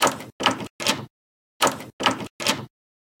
LoopSet 02.02-SimpleDoor2
I was showing the spectrogram of different sounds to my daughter Joana, who has just turned 6.
At some point I decided to let her choose what sounds to look at. She initially picked 'cat', 'dog' and 'dragon'. And then typed in a sentence 'locking a door', and eventually we got to this sound:
Joana realized it had rhythmic properties and said "sounds like a rhythm". I played it in loop mode and she said "too fast!". We agreed that the gaps between the sounds were just not right and needed rearranjing. - At this point I was already thinking of the Continuum-4 mini-dare :-)
I will add more sounds to this pack soon, with modified versions or additional sounds layered on top.
150bpm, 2bars, Continuum-4, door, door-handle, Joana, loop, loopable, rhythm, rhythmic, seamless-loop, simple